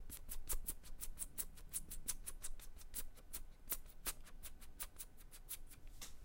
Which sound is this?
04 -Batido de alas mariposa
sonido que simula el batido de alas de una mariposa